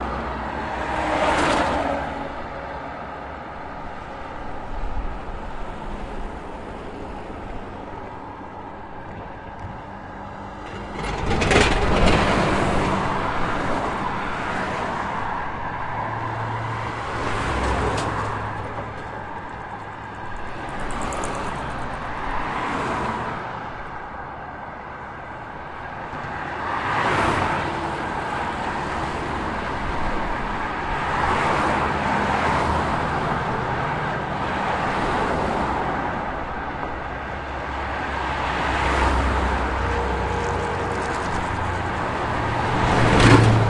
cars on Leningradskiy bridge2
Cars drive over the Leningradskiy bridge.
Recorded 2012-09-29 04:30 pm.
Russia, roar, rumble, cars, atmosphere, atmo, bridge, Omsk, 2012, noise